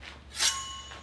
First recording of sword in large enclosed space slicing through a body or against another metal weapon.